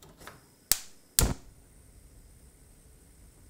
Gas Stove Turn On 2
Gas stove clicking fire burner
burner, clicking, fire, gas, stove